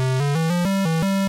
Used in my game "Spastic Polar Bear Anime Revenge"
Was synthesized in Audacity.
8bit, arcade